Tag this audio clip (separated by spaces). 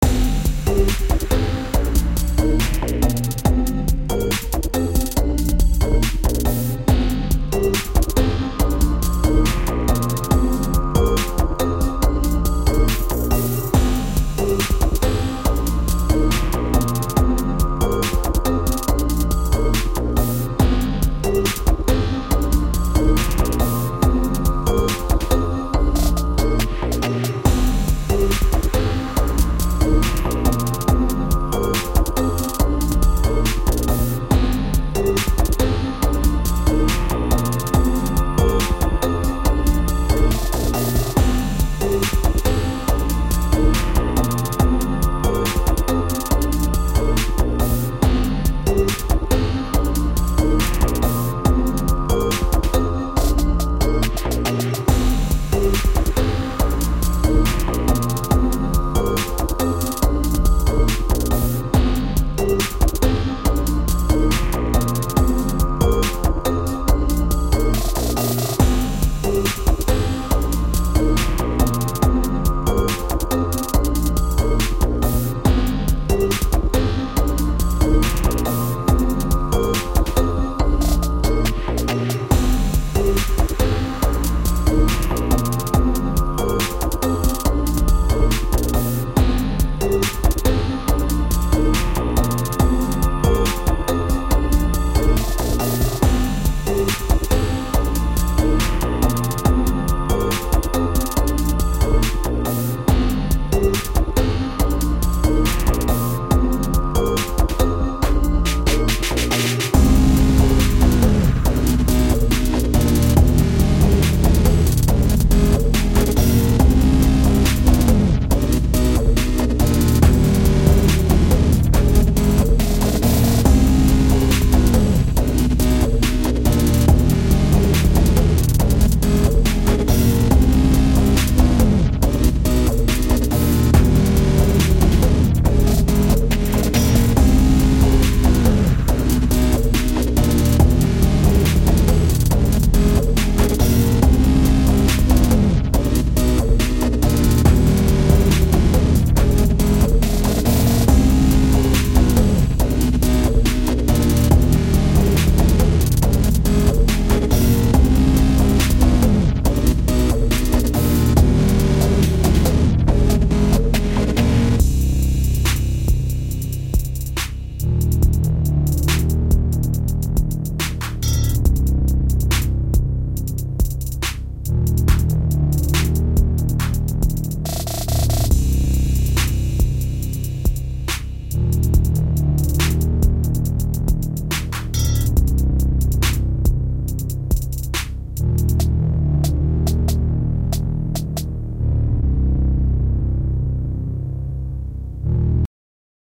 electro electronic loop music synth